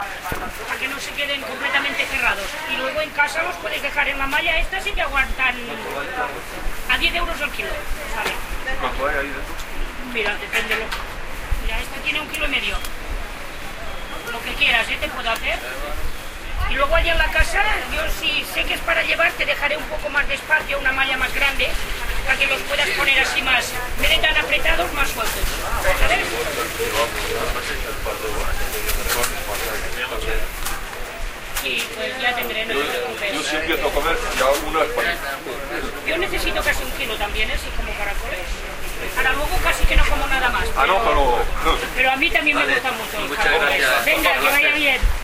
Mercat - Pla a Catalunya
This sound was recorded with an Olympus WS-550M and describes the ambient of the city in the market, on Saturday.
conversation, figueres, market, people